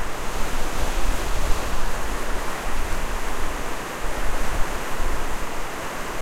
sndWaterfall Loop1
This is an edit of
to make it loop and also to remove the bird chirping.
Environment, waterfall, Loop